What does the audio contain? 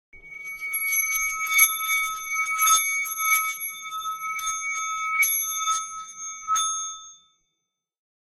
Stereo Harmony Bells
Two recordings of me playing with a singing bowl, played at different rates and panned L and R.
harmonics, monks, singing, monk, tibetan, meditation, harmonic, bell, edited, twinkle, design, glimmering, edit, bowl, stereo-recording, shining, sound-design, bells, harmony, sound, sounddesign, tibet, singing-bowl, cinematic, glimmer, twinkling, shiny